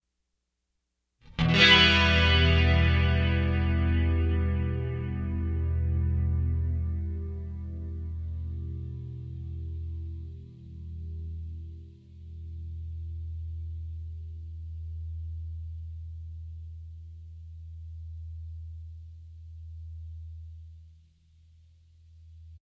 Fluttering Melody
A 'fluttering' sound produced on a MIDI guitar and processed through a Korg NX5R sound module.Recorded in Collingswood, NJ, USA